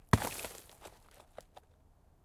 Two balls of gravel and dirt falling on hard floor. Medium impacts.